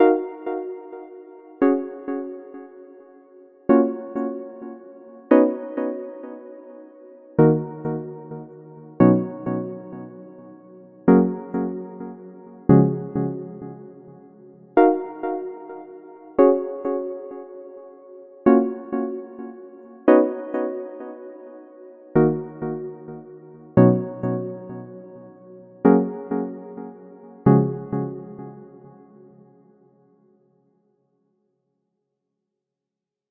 Beautiful Ambient Melody
Here is a short beautiful melody created in fl-studio - can easily be looped.
ambience, ambient, atmosphere, background, beautiful, bright, calm, cinematic, drama, empty, film, fl, hope, loop, loopable, melancholic, melody, movie, music, outro, peaceful, piano, relaxing, reverb, sad, slow, soothing, soundscape, staccato, studio